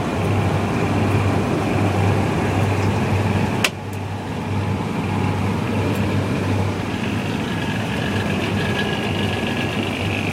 supermarket fridge
The sound of a fridge inside a supermarket.
supermarket, field-recording